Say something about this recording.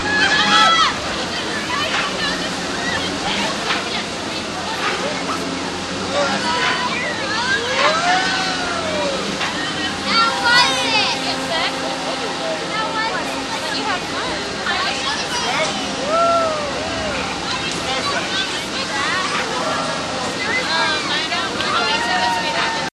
newjersey OC wonderlando
Wonderland Pier in Ocean City recorded with DS-40 and edited and Wavoaur.